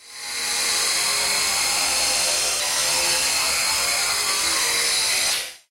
Screech FX
A screechy sound effect.